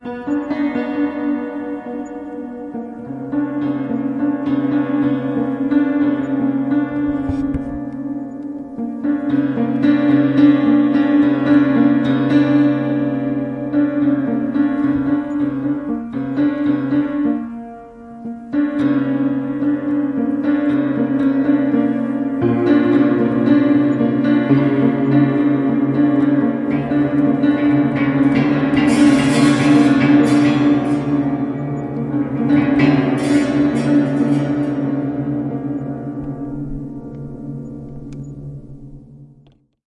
Detuned Piano Patterns Rhythmic
A whole bunch of broken piano sounds recorded with Zoom H4n
out-of-tune, dramatic, detuned, destroyed, filmic, suspense, thrilling, untuned, soundtrack, noisy, macabre, film, creepy, spooky, piano, haunted, eery, broken, upright, anxious